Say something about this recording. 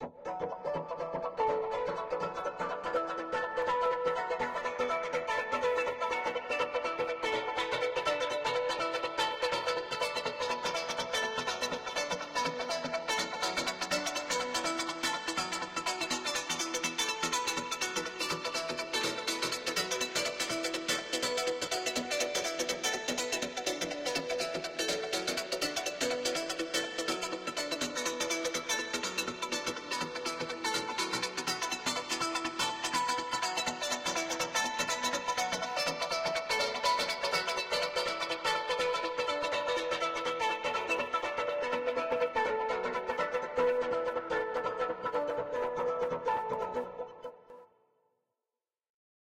A guitar-like/sitar-like synth "arpeggiated" sequence. In a wide space.
Part 3 : full.
Electric, Plucked, Sitar, Space, Strings, Wide